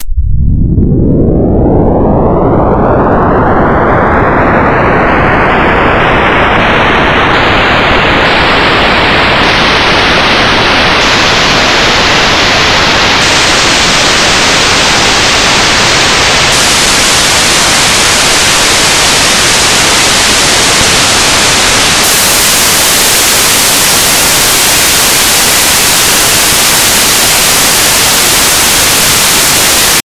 23 LFNoise2 FreqSweep
This kind of noise generates sinusoidally interpolated random values at a certain frequency. In this example the frequency increases.The algorithm for this noise was created two years ago by myself in C++, as an imitation of noise generators in SuperCollider 2. The Frequency sweep algorithm didn't actually succeed that well.